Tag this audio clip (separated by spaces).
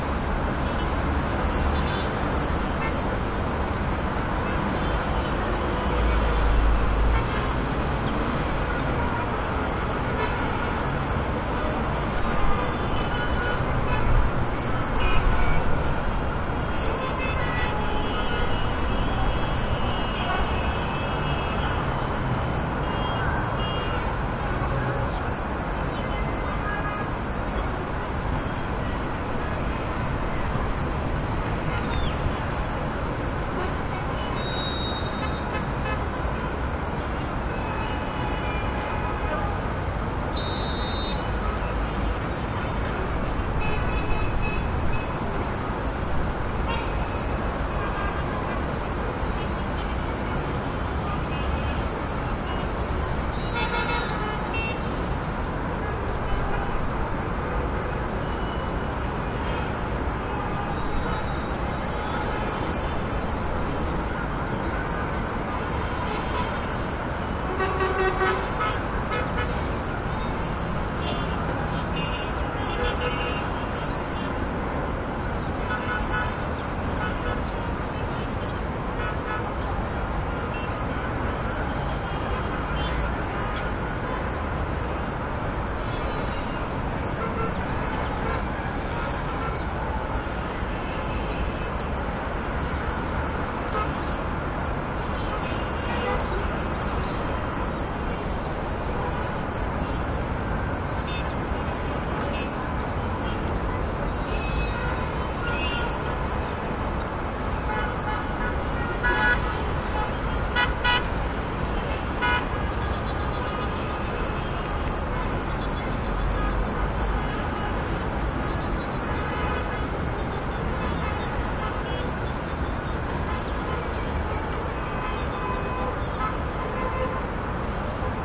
city distant East haze honks horn Middle skyline traffic